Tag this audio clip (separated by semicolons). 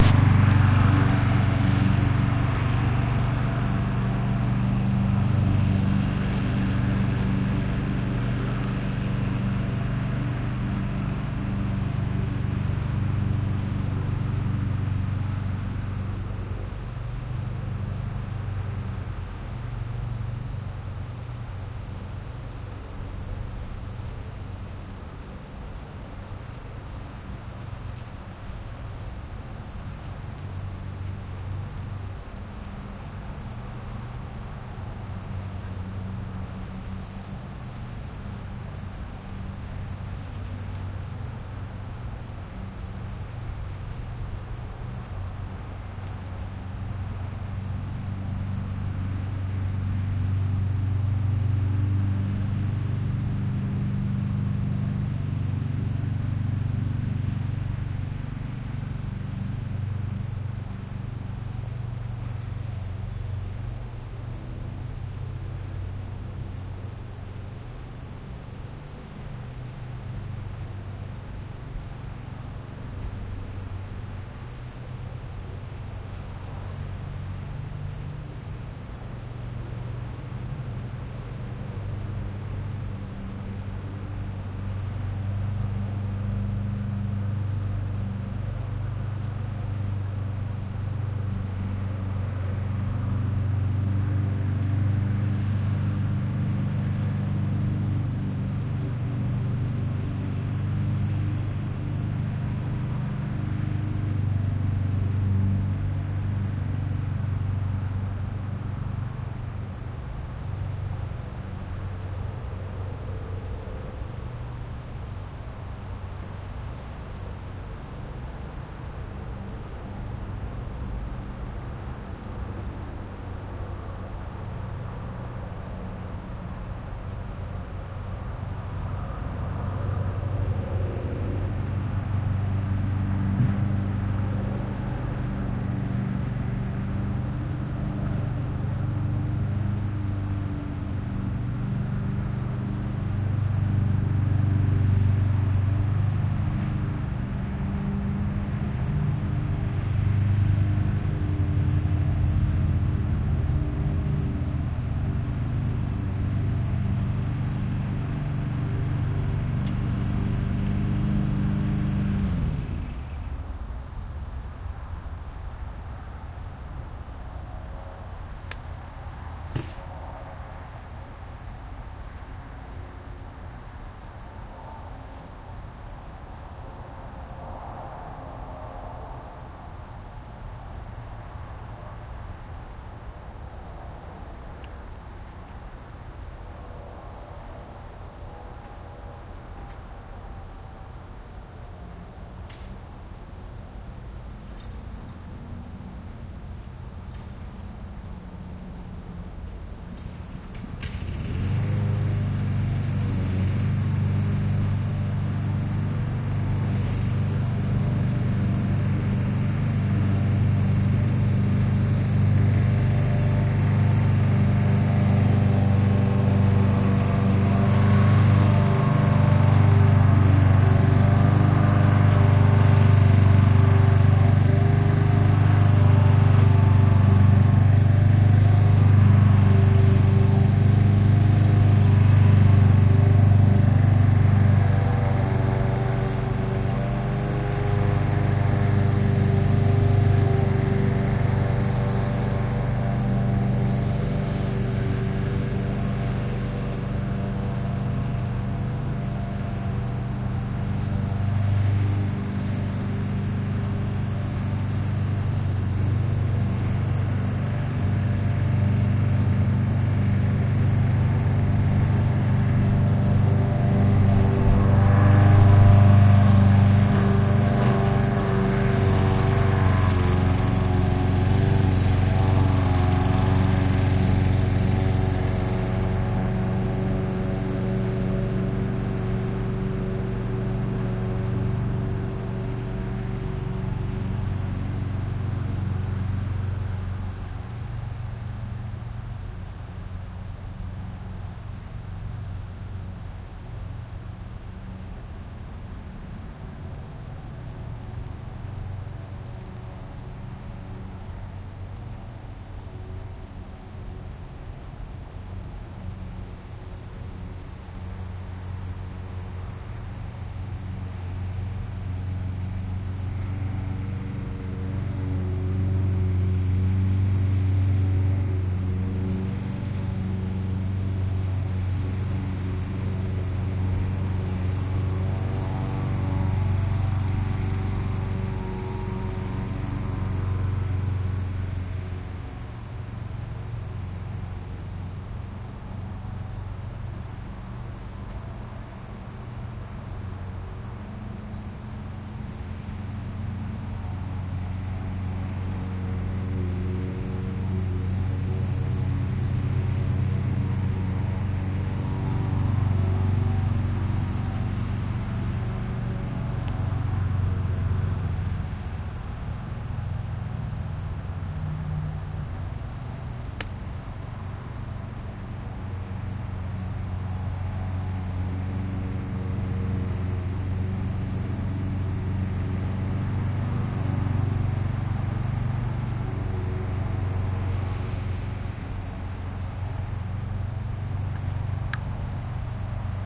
birds; field-recording; gun; plane; wind